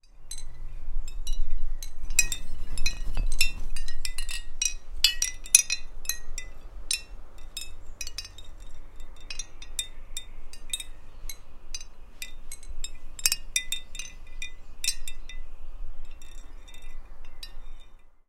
An ME66 with softie being pushed into the shells of a hanging mobile to start them tinkling against each other!
shell, hanging-mobile